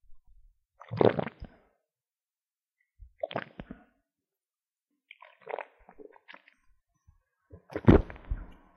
Swallow Zoom H4
Some swallow sounds
engolir, swallow, zoom-h4